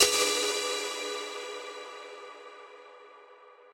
inoe tjing - Part 4
a very nice synthetic spooky hit. made this with a reaktor ensemble.
hit, industrial, scary